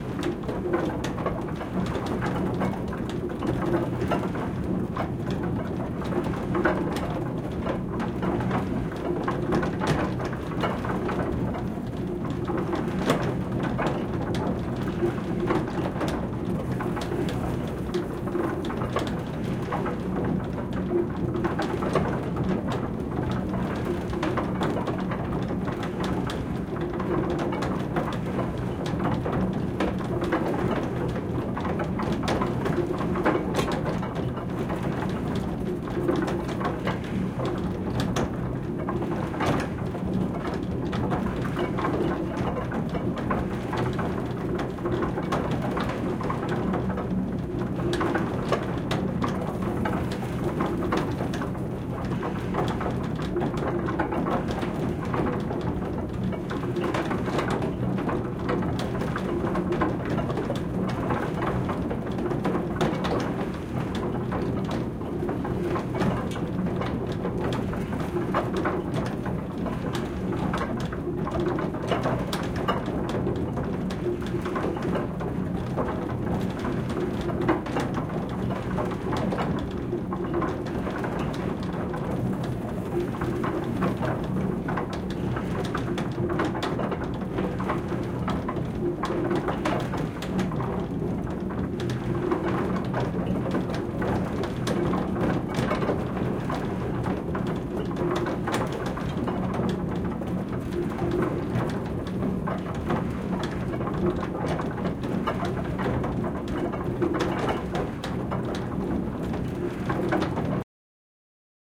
Water mill - gears and belts
These sounds come from a water mill in Golspie, Scotland. It's been built in 1863 and is still in use!
Here you can hear the gears and belts in the top floor of the mill.